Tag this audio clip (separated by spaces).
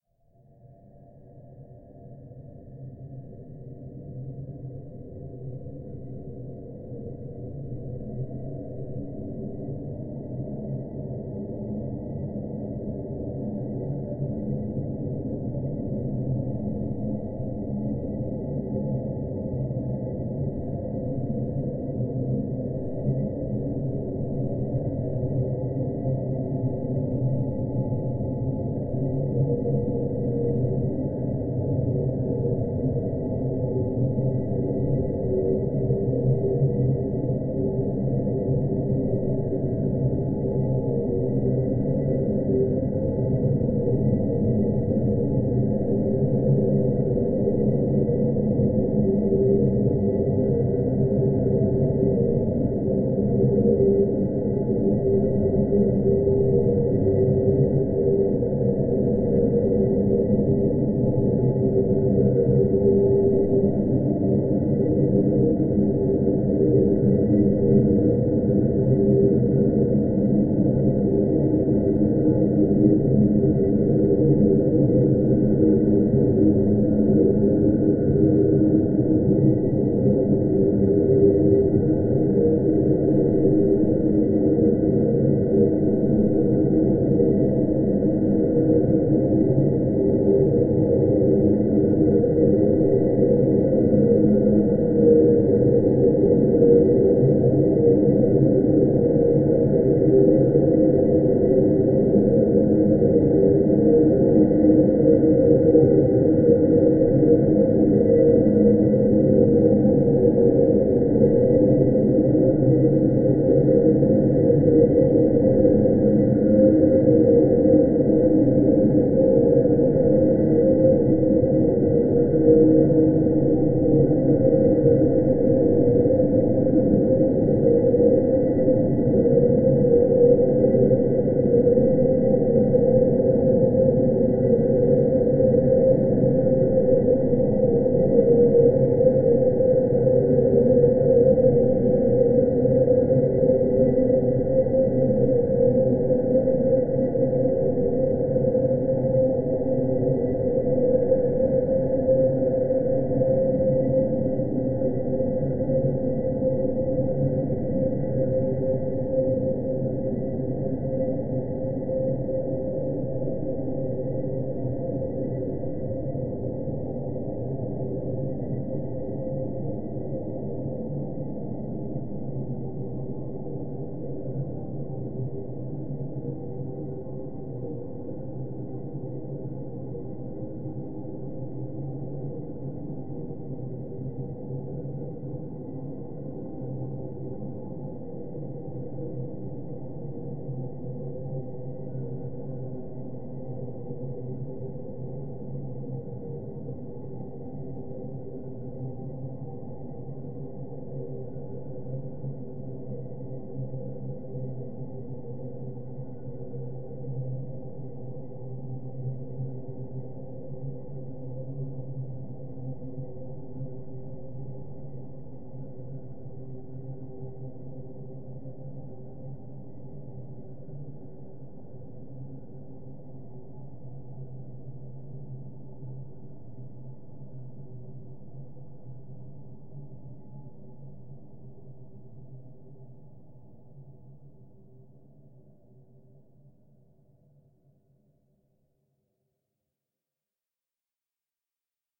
ambient atmosphere drone multisample